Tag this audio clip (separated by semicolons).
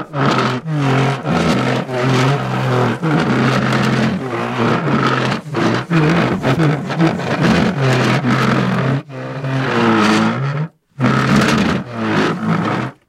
bassy; chair; heavy; load; loaded; motion; moving; pull; pulled; pulling; pushed; squeaks; squeaky